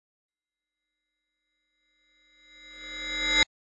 Freeze 4-Audio-9
I used the free Lokomotiv synth as source, with shit tons of effect processing and mixdown bouncing to result in almost sounding unrecognizable from its starting point.
cinematic, deja-vu, feedback, foley, FX, glassy, glitch, matrix, mindhack, reverse, ringmod, sci-fi